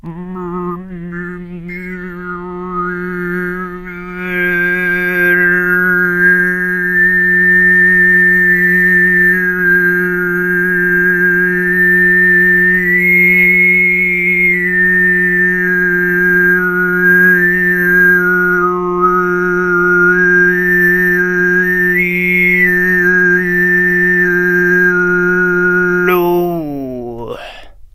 alfonso high 21
From a recording batch done in the MTG studios: Alfonso Perez visited tuva a time ago and learnt both the low and high "tuva' style singing. Here he demonstrates the high + overtone singing referred to as sygyt.
high, overtones, singing, sygyt, throat, tuva